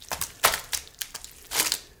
Pumpmkin Guts Fall
Pumpkin Guts Squish
guts, pumpkin, squish